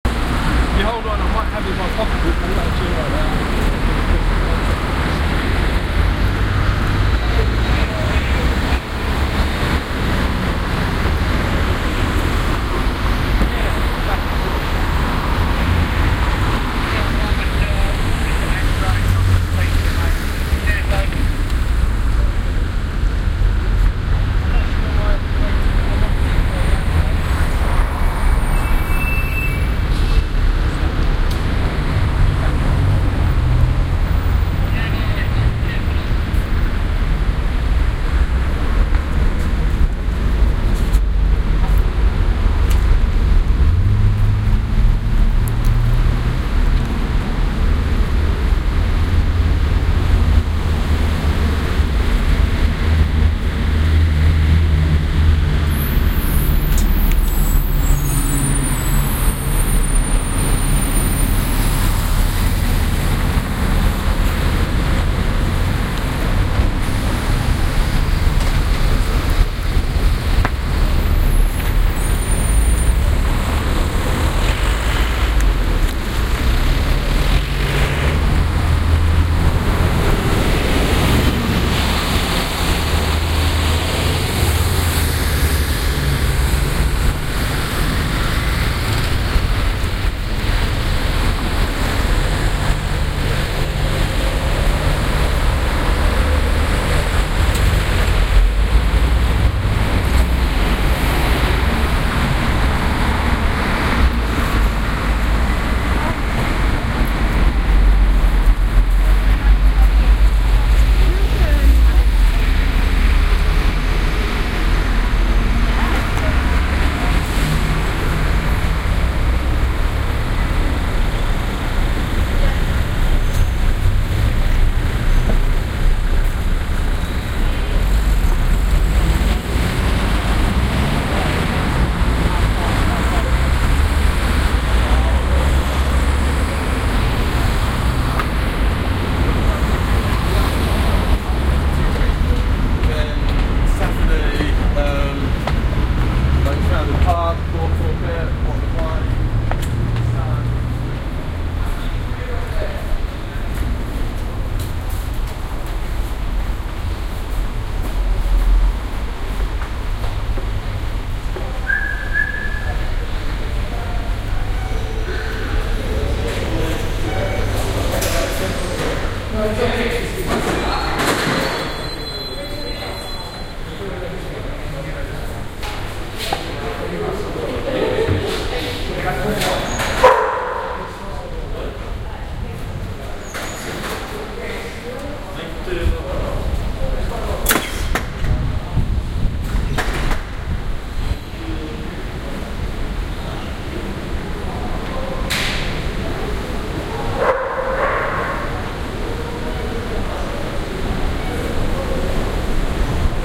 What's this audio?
Old Street - Policeman on the beat
ambiance, ambience, ambient, atmosphere, background-sound, city, field-recording, general-noise, london, soundscape